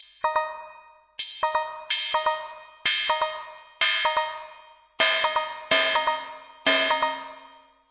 using a classic Roland drum machine with added processing
drum, electro